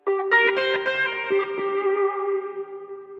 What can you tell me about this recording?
A solo guitar sample recorded directly into a laptop using a Fender Stratocaster guitar with delay, reverb, and chorus effects. It is taken from a long solo I recorded for another project which was then cut into smaller parts and rearranged.
processed, rock, guitar